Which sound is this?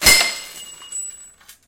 snd metal smash
A hard, metallic crash, like a metal object being smashed to pieces on impact.
bang, break, crash, crush, hit, impact, metal, metallic, smash